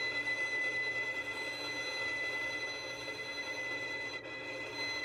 poppy B 4 harm norm
recordings of a violin (performed by Poppy Crum) playing long sustained notes in various expressions; pitch, dynamics and express (normal, harmonic, sul tasto, sul pont) are in file name. Recordings made with a pair of Neumann mics
note, high, pitched, shrill, long, violin, squeak, sustain